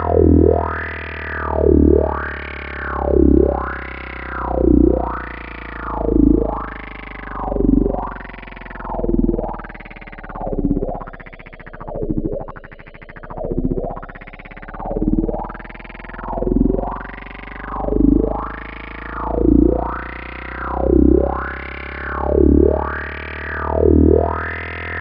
Bass Wah Loop Deep Low Pulse